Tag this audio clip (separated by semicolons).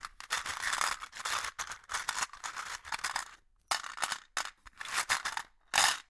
CZ; Czech; Panska; screws